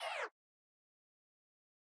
Mild minimal indicator of pain or injury or minimizing of UI window/return
damage, injury